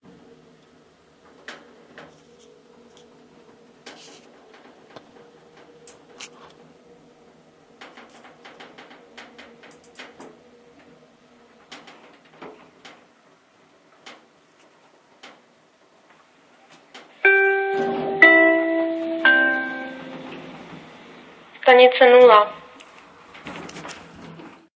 Station 0 in Elevator
Recorded with cell.
Czech elevator ambiance. Jingle and announcement
jingle, annoucement, elevator, czech